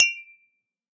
glass cling 04
cling of an empty glass